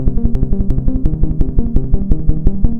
More bassy synth loops with temp indicated in tags and file name if known. Some are edited to loop perfectly.
bpm, 85, synth, loop